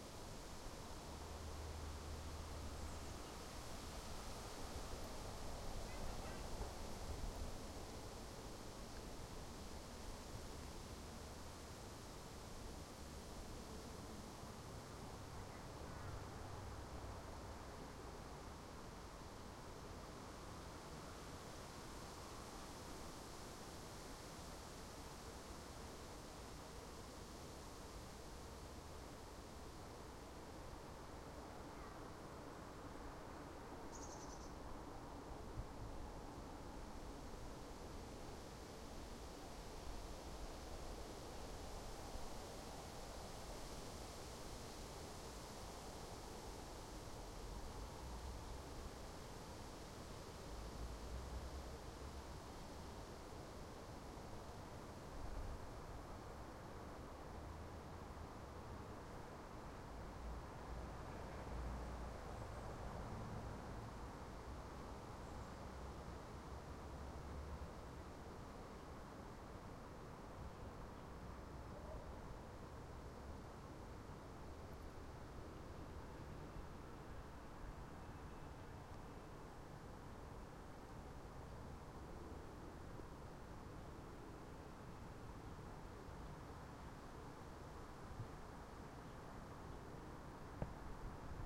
Forest Day Wind roadhumm 01
A simple field recording of an autumn day in Tikkurila, Vantaa, Finland.
Day, Summer, Wind, Forest, Field-recording, Road, Cars, Autumn